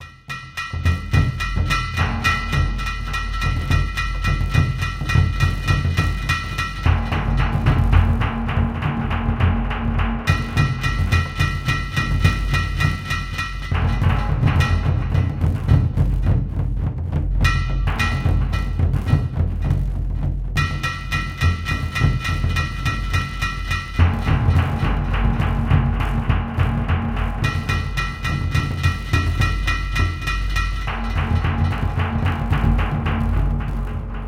This was originally composed at the keyboard as an improv on multiple tracks. I sped it up to 210 bpm and ran it through the Vita virtual synthesizer as "Soundtrack Percussion", amps off, S and R filters on and lots of delay. A virtual drum loop with a human touch. Heavy beat. War Drums 3 is a faster version of this.